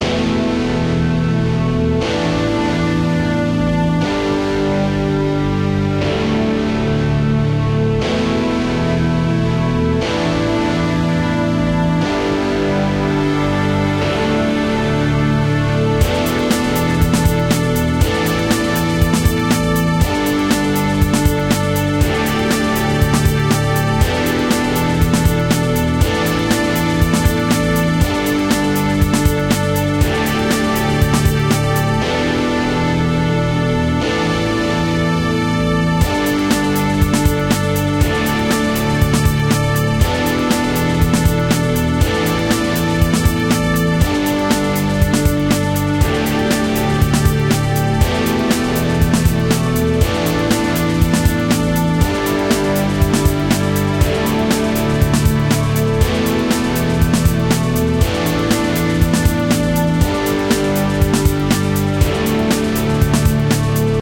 Epic Finale (loop)
cinematic, dynamic, epic, finale, free, game, heroic, loop, music, orchestral, soundtrack, sountracks